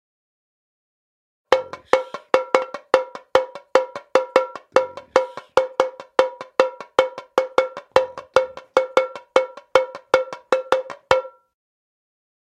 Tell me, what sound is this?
Tamborim, levada de samba.
brazil, brazilian, mpb